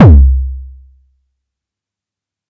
Moog Model 15 App Kick Drum session 01 2021 by Ama Zeus 5
This is Model 15 app kick recorded with Solid State Logic audio interface and some other analog gear.Have fun!
Greets and thx!
Kick, Analog, SSL2, Moog-Model-15-app, Sample, 808, Moog, Solid-State-Logic, Drum, Synth